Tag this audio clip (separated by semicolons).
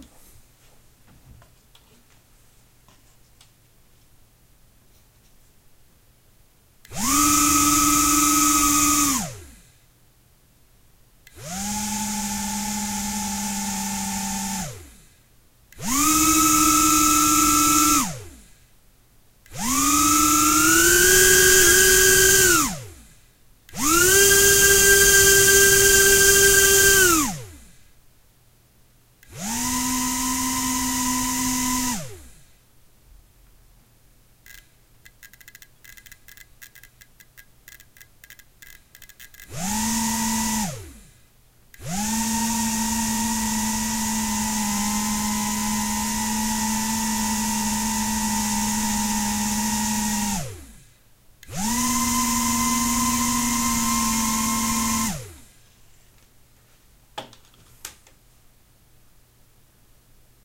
flying; mini; off; quadcopter; wind